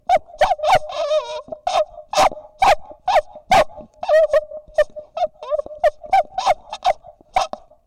A "microscopic" dog barking on outside of shop, waiting for maiden I think. Like chihuahua but even smaller. Dogs have much passion for the owner.
barking, dog